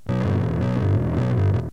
Snippet I found in between prank phone call tapes I made around 1987. Peavey Dynabass through Boss Pedals and Carvin Stack.